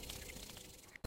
Rub Hands Manipulated
Me rubbing my hands together, manipulated by reverse function as well as speed/pitch change.
hands, MTC500-M002s13, rub